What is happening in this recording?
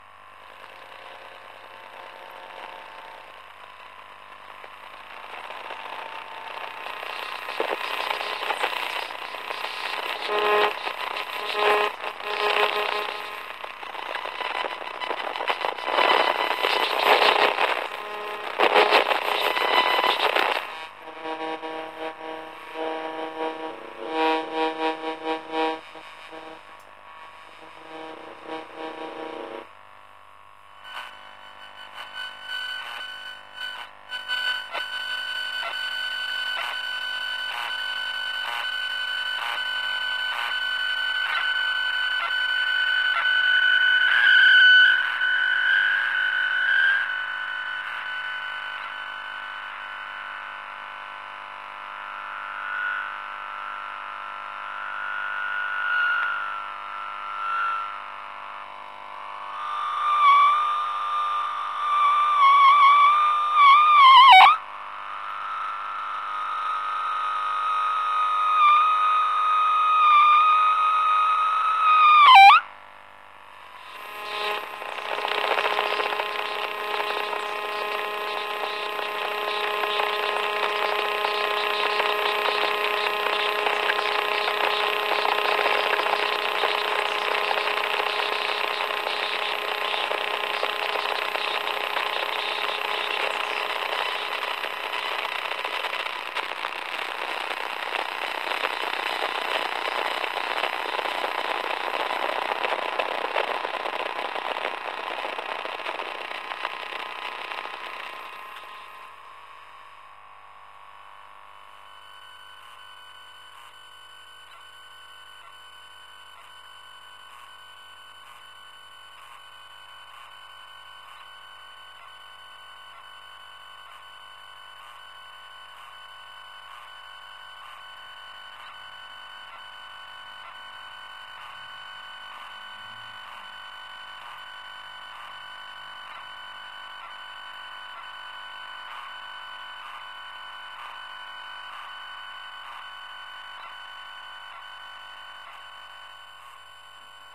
static noise, several different ones
static noise of different electric gear recorded through a telephone-amplifier
Recorded with Zoom H4N, built-in microphone at 90°
telephone-amplifier, static, noise